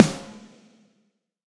SBUC SNARE 004
Real and sampled snares phase-matched, layered and processed. Contains two famous snare samples. These "SCUB" snares were intended to be multi-purpose samples for use in any genre of music and to be mixed 50/50 with the real snare track using Drumagog / Sound Replacer. Example 4 of 5.
drum, processed, real, sample, snare